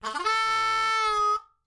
Harmonica Fast Rip Bend 04
This is a rift I came up with to end a song. Played on a Marine Band harmonica key of g
Ending, Harmonica, Rift